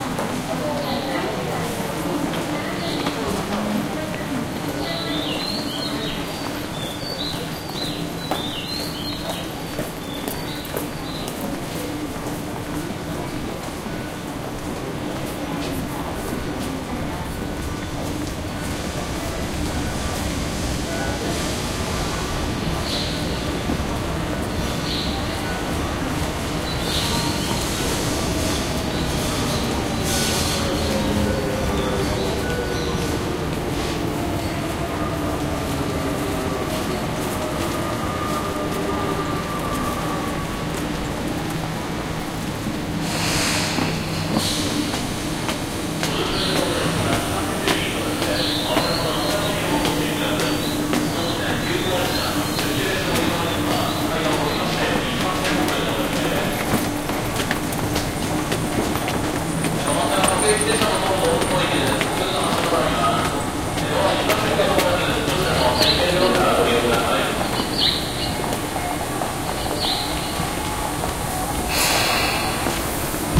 Japan Tokyo Train Station Footsteps Melodies
One of the many field-recordings I made in and around train (metro) stations, on the platforms, and in moving trains, around Tokyo and Chiba prefectures.
October 2016.
Please browse this pack to listen to more recordings.
train-station, announcement, Japan, subway, tram, rail